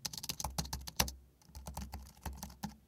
es-keyboard
computer, keyboard, Mac, typing